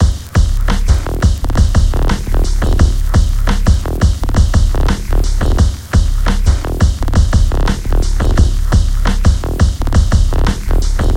sprk drums loop 86bpm
86bpm, Ableton, drum-loop